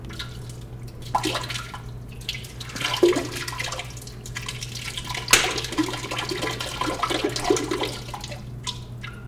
Bathroom Pee03
flush, toilet